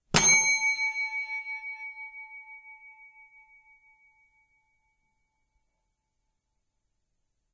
bell hotel service desk
service, bell, hotel, desk